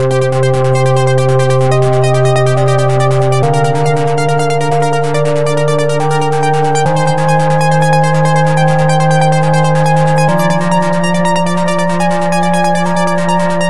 Arpeggiated sequence of synths and pads.
beat, melody, techno, trance